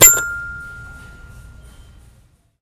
My toy piano sucks, it has no sustain and one of the keys rattles. This really pisses me off. So I went to Walmart and found me a brand new one, no slobber, no scratches, no rattling. The super store ambiance adds to the wonder.
toy, xylophone